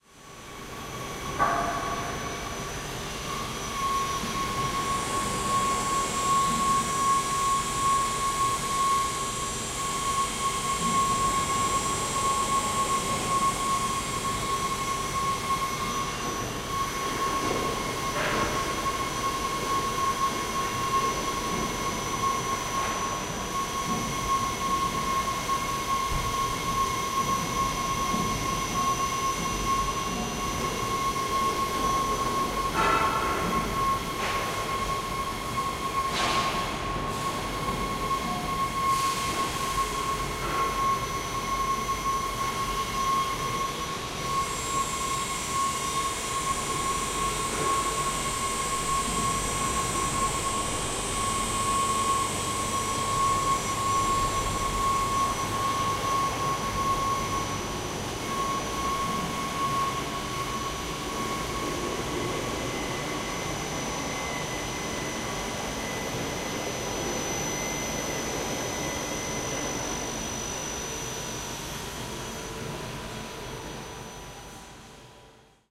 Industrial texture
Industrial machinery factory sounds. Recorded with Tascam DR 22WL.
In case you used any of my sounds I will be happy to be informed, although it is not necessary.
Recorded in 2019.